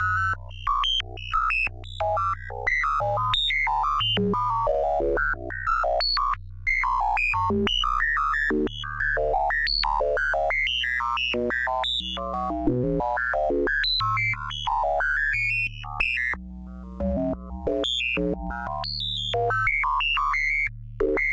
S&H; wave lfo doing some funky shit